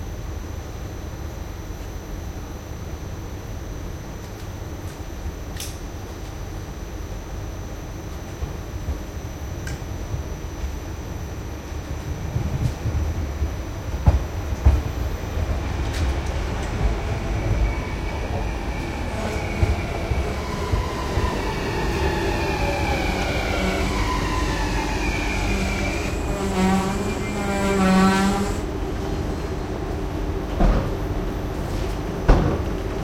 Trainstation stopping train
Field recording of an electric train stopping at a Dutch trainstation.
Platform, Train